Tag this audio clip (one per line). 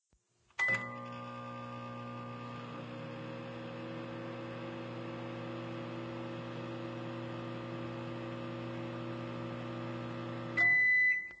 microwave
off